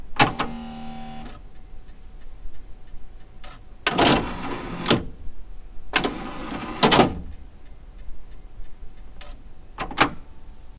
CD-player, start & stop, open & close
A CD player that I am starting, stopping and opens/close the tray on.